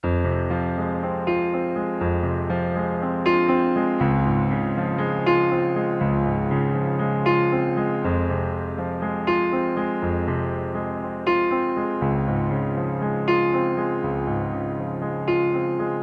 dark piano-accomplisment with left hand, to replace bass or use as intro.